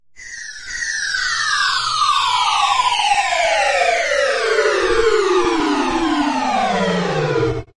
Time Travel - Present
A sound derived from my "square wave build up" sfx.
Edited to sound like traveling through time.
This sound, as well as everything else I have upload here,
is completely free for anyone to use.
You may use this in ANY project, whether it be
commercial, or not.
although that would be appreciated.
You may use any of my sounds however you please.
I hope they are useful.